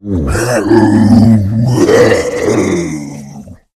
A powerful low pitched voice sound effect useful for large creatures, such as orcs, to make your game a more immersive experience. The sound is great for attacking, idling, dying, screaming brutes, who are standing in your way of justice.
male, monster, deep, brute, Talk, voice, RPG, game, troll, videogame, Speak, vocal, videogames, gamedeveloping, sfx, Voices, indiedev, indiegamedev, arcade, fantasy, low-pitch, games, Orc, gamedev, gaming